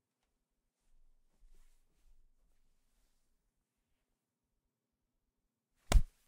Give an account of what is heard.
Body Hits 1 03
Punch or smack
hit, impact, thud